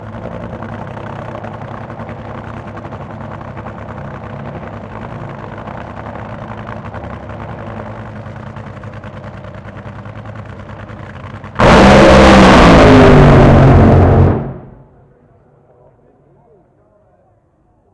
Recorded using a Sony PCM-D50 at Santa Pod raceway in the UK.